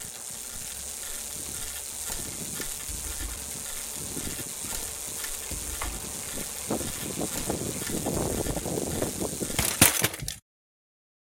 High Speed Wall Crash OS
Mountain-Bike Wall Crash
Mountain-Bike, Wall, Crash